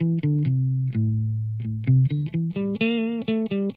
guitar recording for training melodic loop in sample base music
loop, electric, guitar